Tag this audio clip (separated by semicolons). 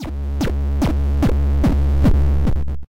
audible; like; music; retro; sound